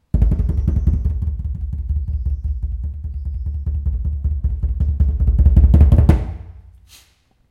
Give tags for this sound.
drum drums kick percussion percussive roll

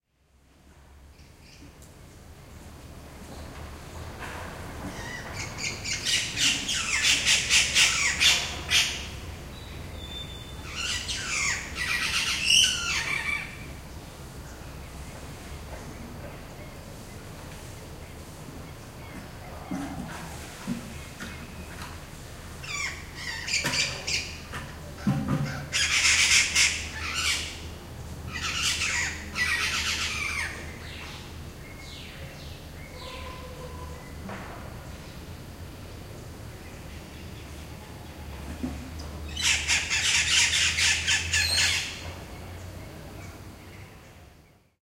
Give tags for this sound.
jungle field-recording birds rare

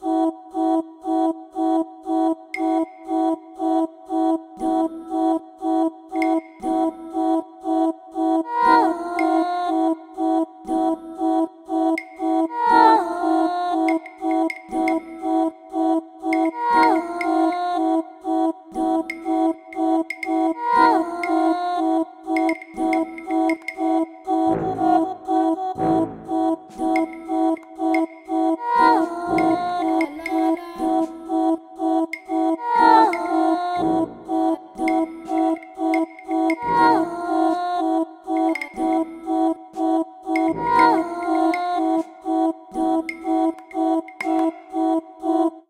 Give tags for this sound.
creepy evil game horror motif music octopus psycho scary spooky squid-game suspense tension terror uneasy vocal weird

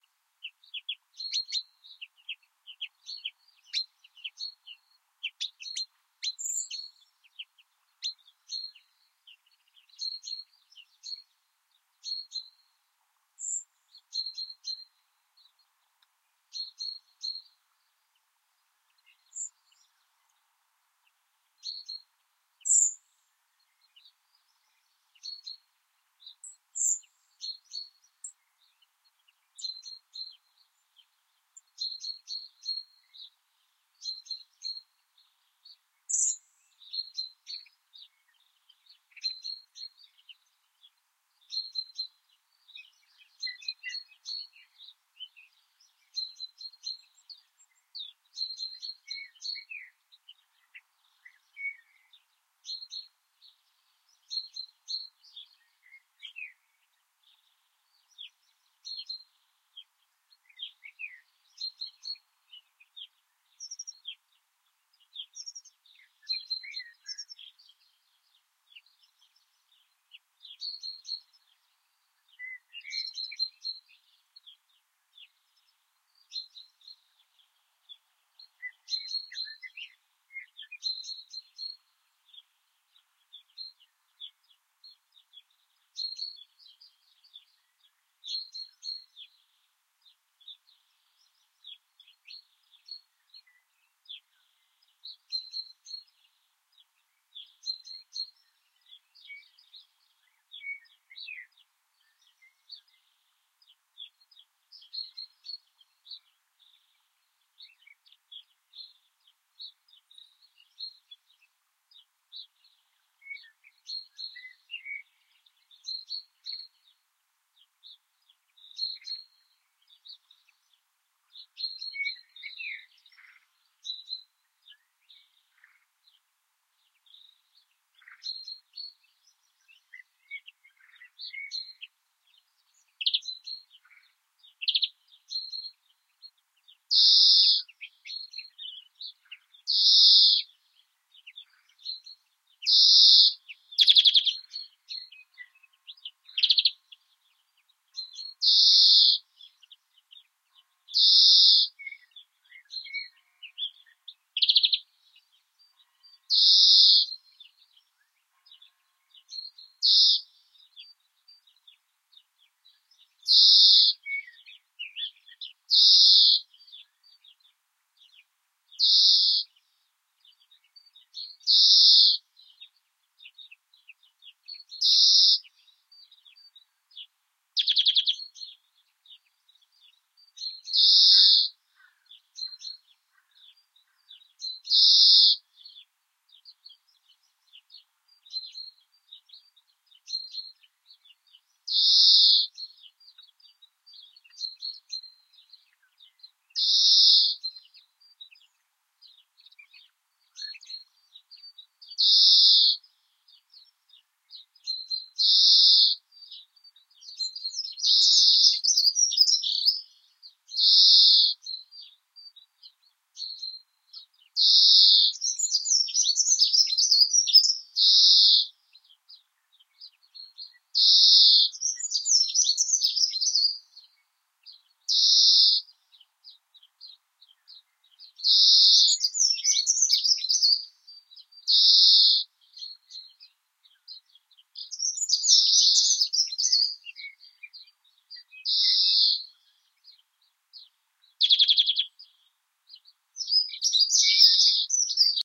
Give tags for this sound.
Birds chirping countryside dawn early morning

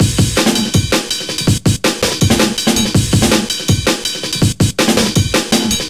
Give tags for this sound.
drum-loop drumloops drums jungle drumloop snare breakbeat drum beats breakbeats drumbeat beat break breaks

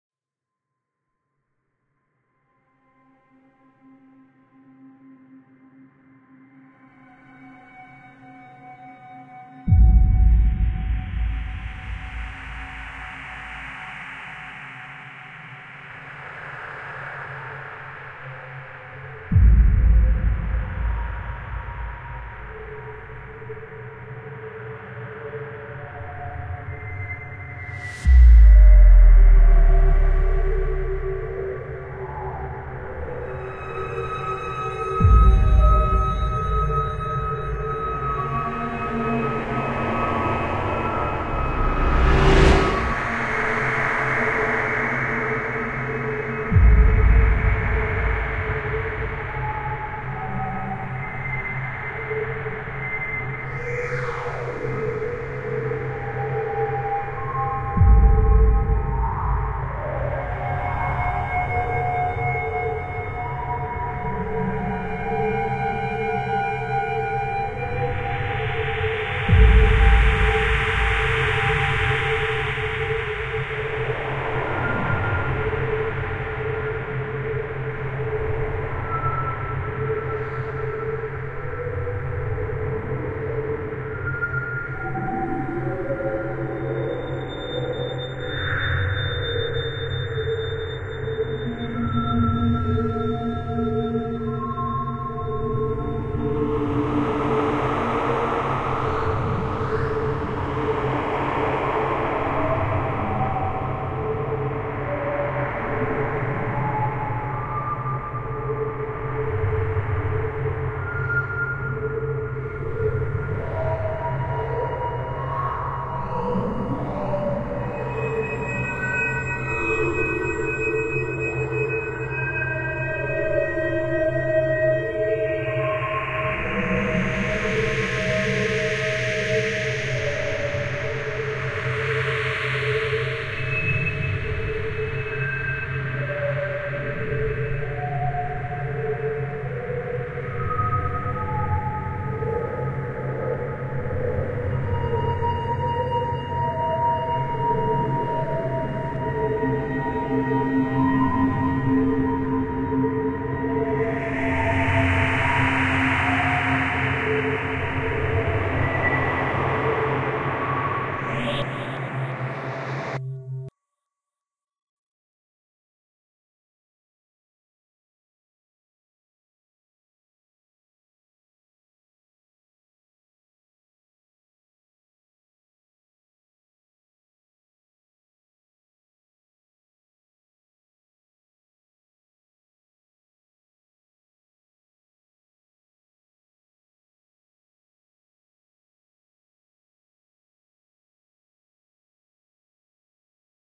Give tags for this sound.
compact gigantic

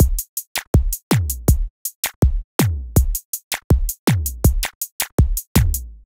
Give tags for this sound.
drum zouk loop beat

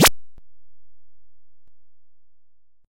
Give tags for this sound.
8bit pick videogame

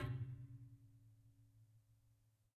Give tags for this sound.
wood scrape

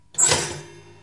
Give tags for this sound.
bread toaster

